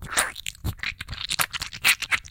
So slimy!
A very slimy and gross sound